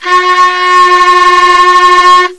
Double clarinet playing A on both horns. Recorded as 22khz